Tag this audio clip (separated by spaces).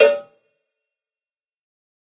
cowbell dirty drum drumkit pack realistic tonys